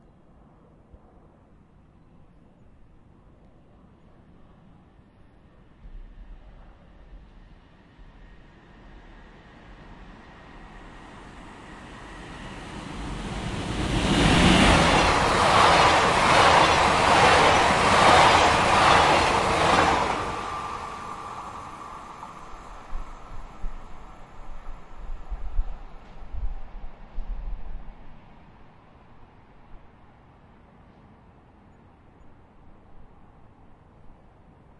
Passage d'un train en gare du Stade - Colombes - France
Train sound in Colombes - Le Stade railway station (France). Recorder with a Zoom H4N, edited with Audacity under Ubuntu Debian Gnu Linux.